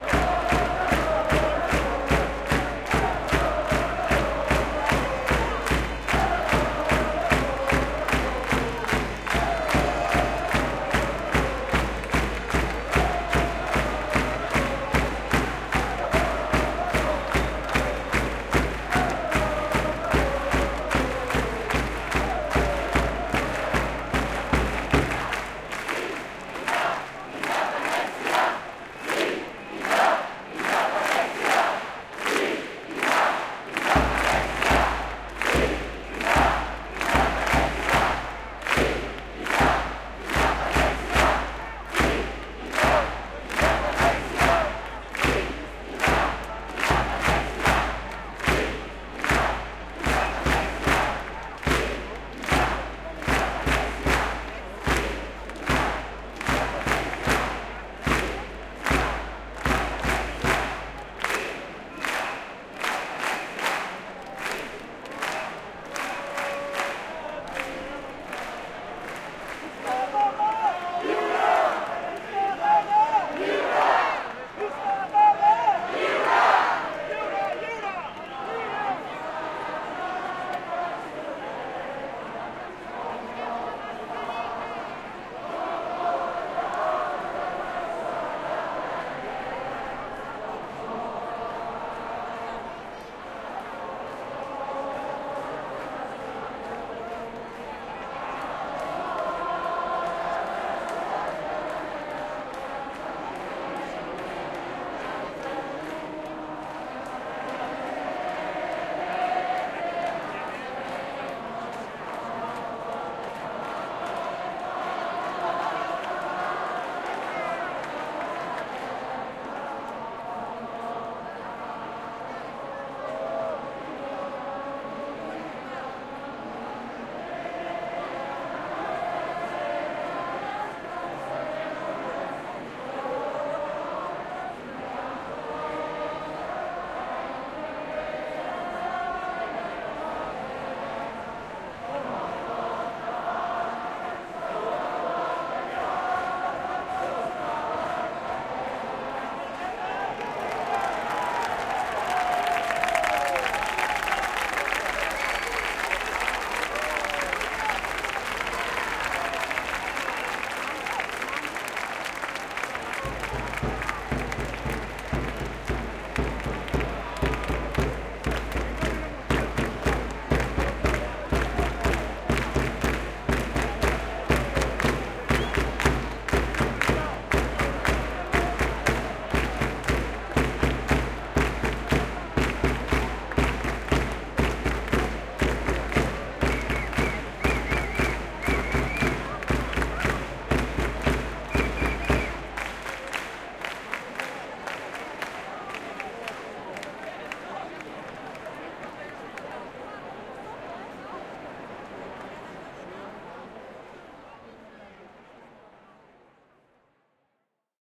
11 setembre 2021 drama in inde independencia visca la terra lliure els segadors
segadors, inde, visca, terra, els, 11, lliure, setembre, independencia, la, 2021